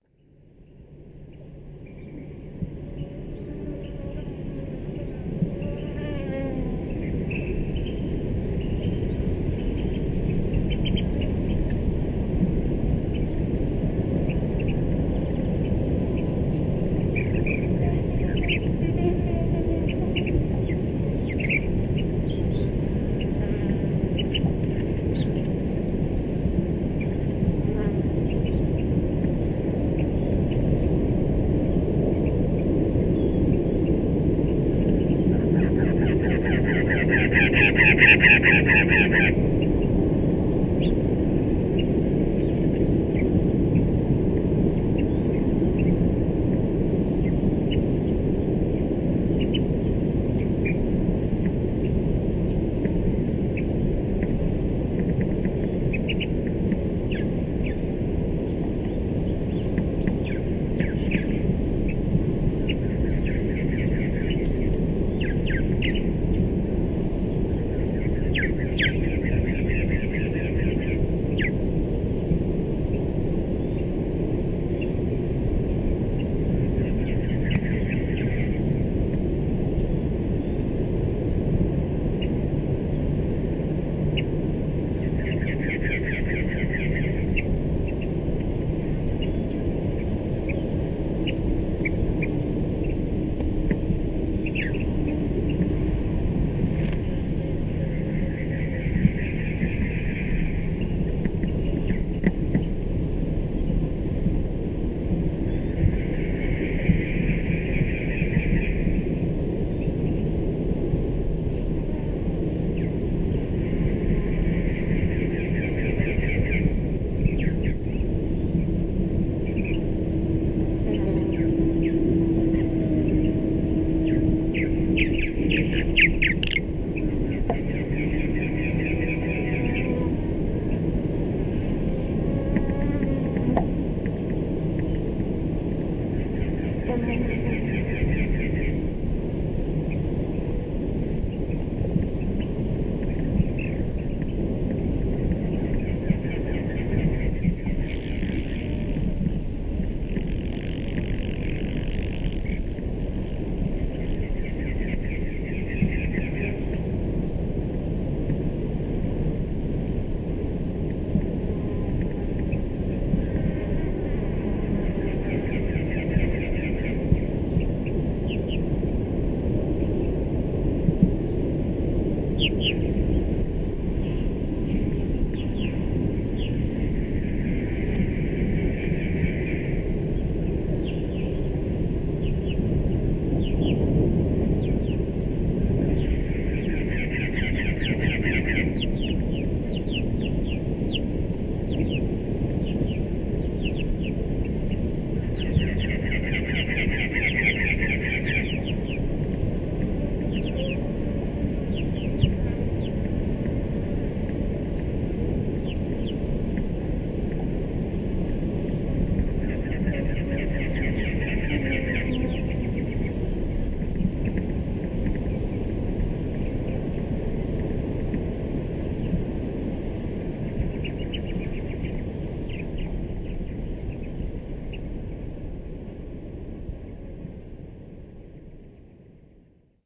CFv1 track15
la-ventana, baja-california-sur